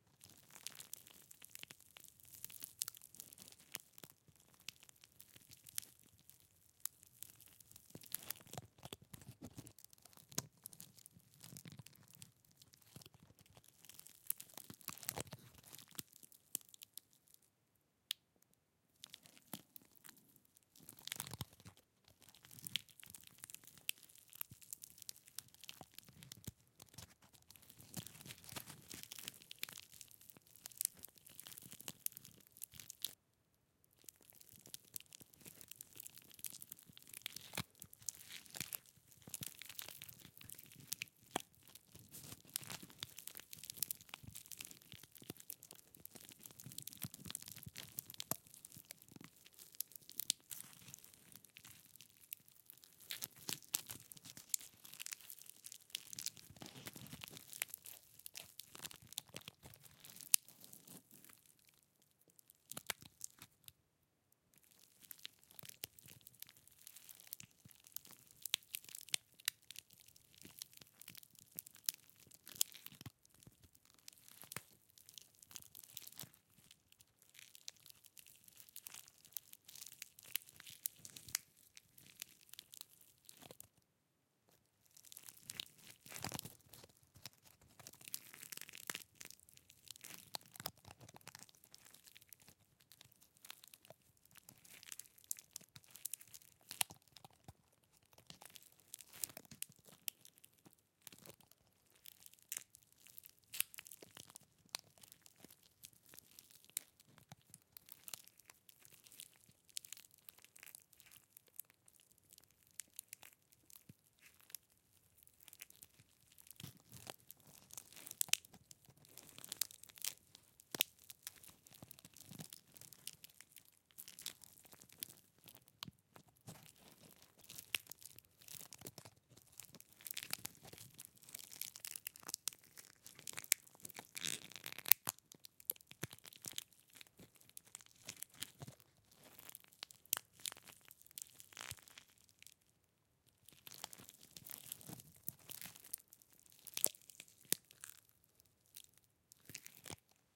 Seed Pod Crush
Crushing by hand a large seed pod I found in Bruere Allichamps, France. Lots of nice crackling, pops and crunchy sounds.
Microphone: Rode NT4 (Stereo)
Snaps, Close-Miced, Leaves, Crushing, Pops, Crackles